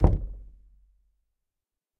Door Knock - 46
Knocking, tapping, and hitting closed wooden door. Recorded on Zoom ZH1, denoised with iZotope RX.
knock, wooden, wood, bang, percussive, closed